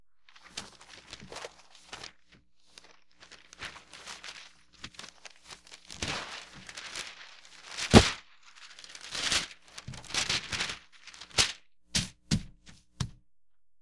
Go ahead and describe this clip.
paper01-unfolding paper#1
Large sheets of packing paper being separated.
All samples in this set were recorded on a hollow, injection-molded, plastic table, which periodically adds a hollow thump if anything is dropped. Noise reduction applied to remove systemic hum, which leaves some artifacts if amplified greatly. Some samples are normalized to -0.5 dB, while others are not.